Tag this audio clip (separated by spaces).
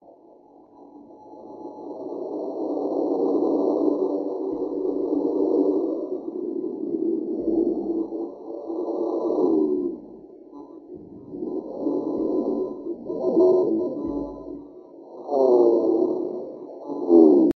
ambient kp3